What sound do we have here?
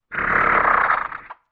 One of many quick scarecrow noises, but you use this for anything really. Original recording was made on an AKG C414 using the Earthworks 1024
There are more than 20 of these, so I will upload at a later date
creature, ghoul, monster, scary